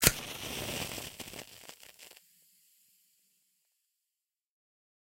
Party Pack, Match, Ignite, 01-01
Lighting a wooden match through friction with a matchbox. A sound from one of my recent SFX libraries, "Party Pack".
An example of how you might credit is by putting this in the description/credits:
And for more awesome sounds, do please check out the full library or my SFX store.
The sound was recorded using a "Zoom H6 (XY) recorder" and "Rode NTG2" microphone on 7th June 2019.
candle, fire, ignite, light, match, matchbox, matches, pack, party